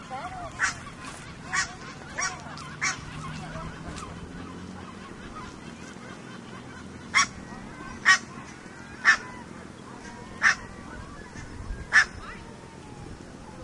20100806.stockholm.barnacle.geese
Barnacle Geese calling at a Stockholm park. Olympus LS10 recorder.
geese, flickr, field-recording, park